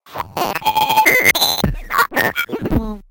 FreakenFurby Glitch 32
Samples from a FreakenFurby, a circuit-bent Furby toy by Dave Barnes. They were downsampled to 16-bit, broken into individual cues, edited and processed and filtered to remove offset correction issues and other unpleasant artifacts.
freakenfurby, glitch, electronic, toy, furby, circuit-bent